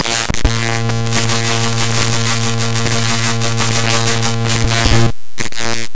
The sound you get here is actually a screen capture of my desktop.
picture, distortion, image, screen, pic, noise, capture